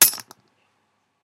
Coin sound made with the metal caps from champagne bottles.
ching, coin, metal